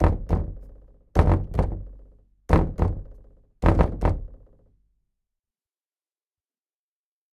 Knocking, tapping, and hitting closed wooden door. Recorded on Zoom ZH1, denoised with iZotope RX.